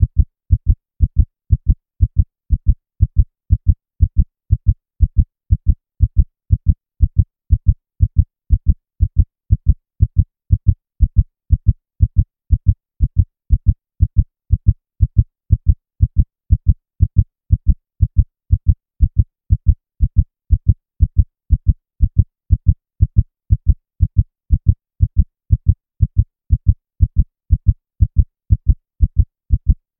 heartbeat-120bpm-limited
A synthesised heartbeat created using MATLAB. Limited using Ableton Live's in-built limiter with 7 dB of gain.